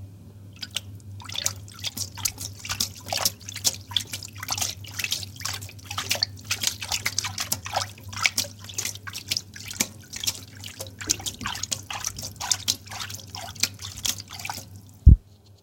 water splash
water, pour, splash, liquid, drops